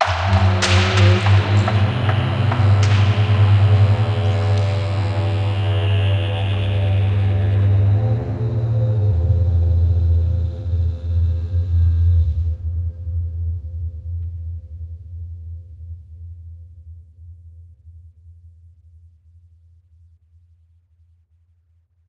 Dark JungleVoice 2
Some dark-shaman voice and small-small tribal sound in background.
For this sample, i use NI Kontakt and many instruments for him...This sample i use in my production for our game projects. Ready for loop. Recorded in Edison by Fruity Loops. Enjoy my best friends!
I realy will be glad if you will use it and found it fit for your projects!